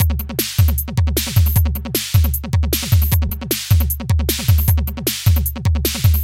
Beats recorded from my modified Roland TR-606 analog drummachine